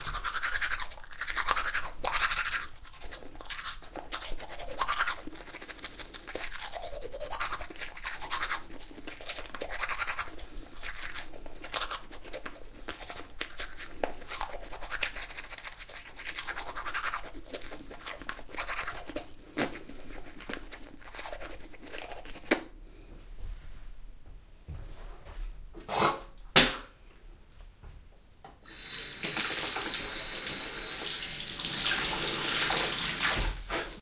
Cleaning Teeth
Tooth Brushing Sound.
brush, brushing, cleaning, teeth, tooth, toothbrush